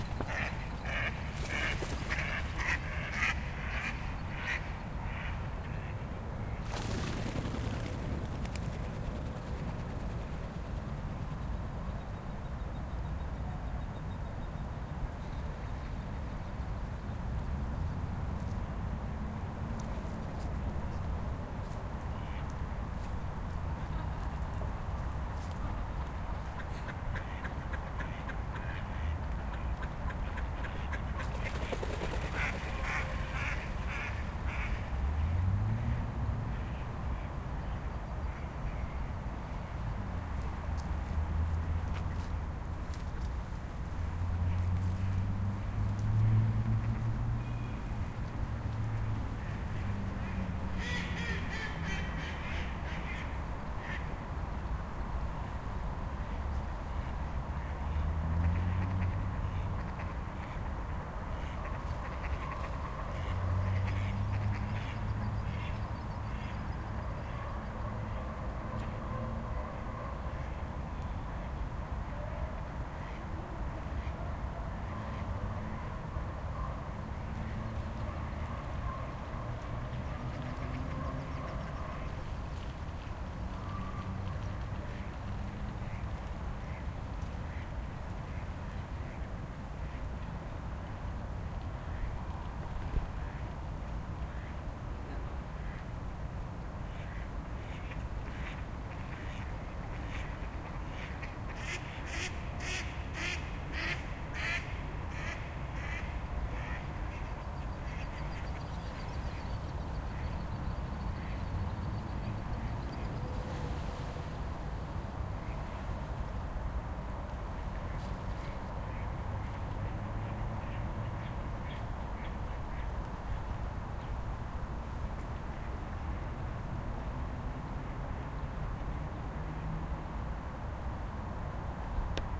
Maksimir pond
ducks flying at night over the pond in MaKsimir Park, Zagreb, Croatia, march 2012